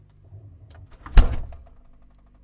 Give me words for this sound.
refrigerator door close interior
A refrigerator door closing from the interior.
Recorded with a Zoom H1 Handy Recorder.
refrigerator, door, interior